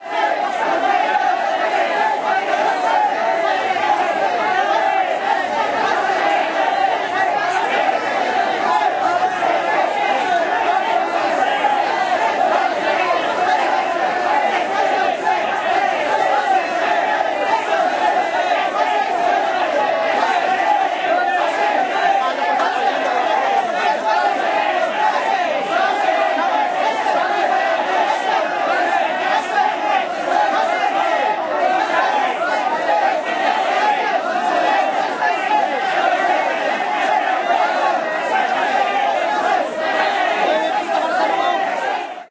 MVI 5950 PH Sabong
Recording of sabongan (place wher rooster fights take place in Philippines).
Here, you can hear men shouting to bet on their favourite rooster just before the fight will start.
Please note that this audio file is extracted from a video kindly recorded in February 2018 by Dominique LUCE, who is a photographer.
Fade in/out applied in Audacity.
men, field-recording, betting, bets, rooster-fight, ambience, sabong, voices, shouting, sabongan, Philippines